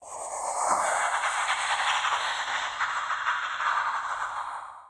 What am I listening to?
I made this in a recording session of making noises into the microphone and I really enjoyed how it turned out.
To me this sounds like a broken up, evil kind of laugh or something like that. So I went with the name of, "Ghastly Cackle". I hope someone finds a use for it because I think it turned out well but I'm not creative enough to put any of my own sounds to use which is why I upload them for other people to use. And if you are putting this into some sort of project like a video or something of the sort, I'd really love to see how it turned out.
Thanks!